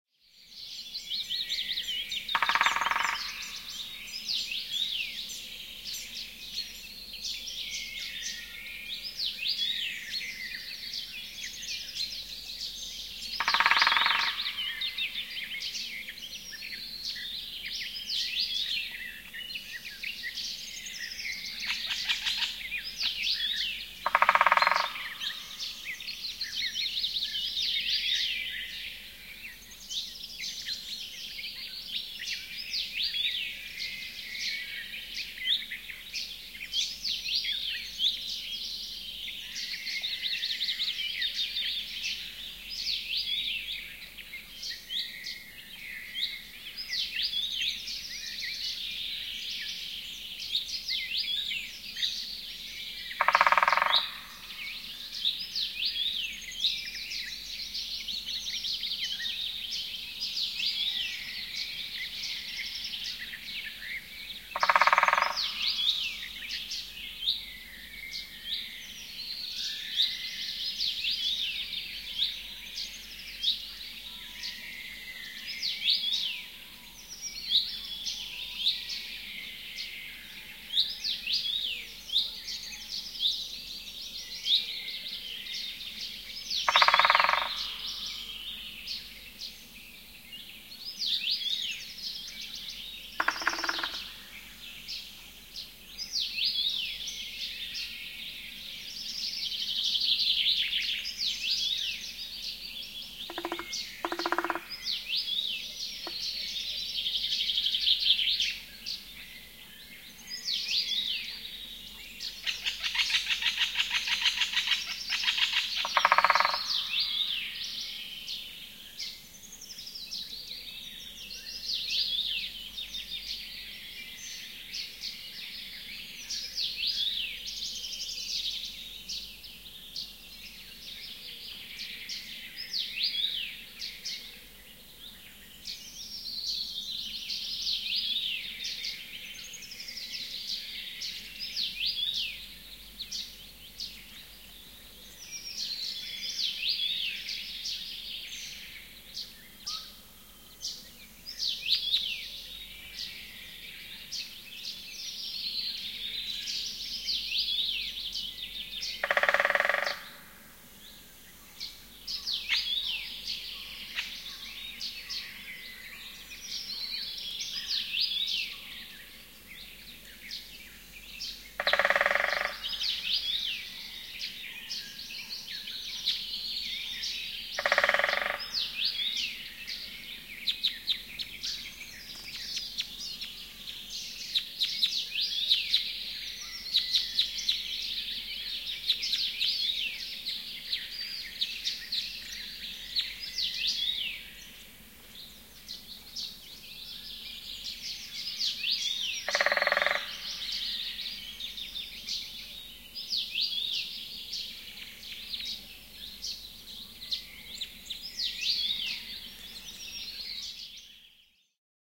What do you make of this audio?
Linnunlaulu, lintuja metsässä / Birdsong, birds in the forest, woodpecker
Metsä, kesä, pikkulinnut laulavat vilkkaasti ja kirkkaasti lehtimetsässä, välillä tikka rummuttaa.
Paikka/Place: Suomi / Finland / Kesälahti
Aika/Date: 02.06.1990
Birds, Field-Recording, Finland, Finnish-Broadcasting-Company, Forest, Linnut, Luonto, Nature, Soundfx, Summer, Suomi, Tehosteet, Yle, Yleisradio